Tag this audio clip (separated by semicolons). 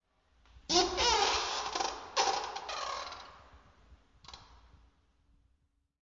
disgusting; fart; farting; farts; flatulate; flatulation; flatulence; flatus; gas; halloween; horror; nice; rectal; rectum